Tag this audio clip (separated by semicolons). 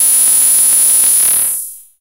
multisample; impulse; basic-waveform; reaktor